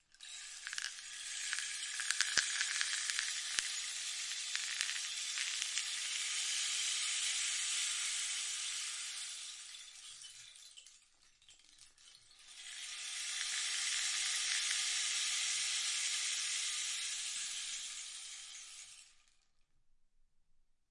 Rainmaker 01 [RAW]
Simple recording of a long bamboo rain maker.
Captured in a regular living room using a Clippy Stereo EM172 microphone and a Zoom H5 recorder.
Minimal editing in ocenaudio.
Enjoy ;-)